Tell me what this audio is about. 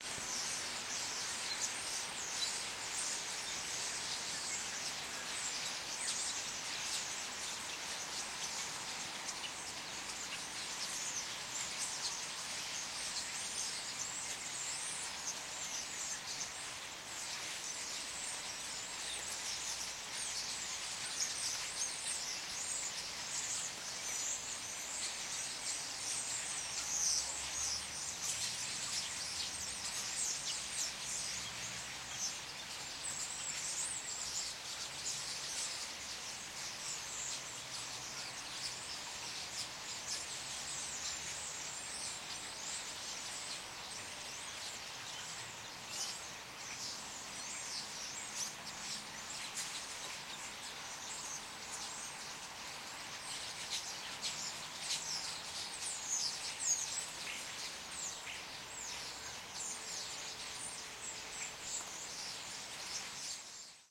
07-birds-at-night-in-trees-in-Hilversum-in-snow
Field recording of a flock of birds (common Starling aka Spreeuw) in a tree amidst snow, close to a train station.
Recorded with a Olympus LS-10
HKU, animal, bird-songs, birds, birdsong, birdssong, city, eery, field-recording, flock, nature, night